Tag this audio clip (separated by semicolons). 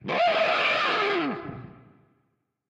Funny; Cartoon; Gibberish; Radio; Angry; Megaphone